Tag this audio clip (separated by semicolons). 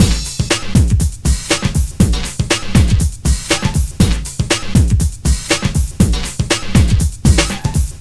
120bpm; beat; break; breakbeat; distorted; drum; funk; hip; hop; loop; sfx; trace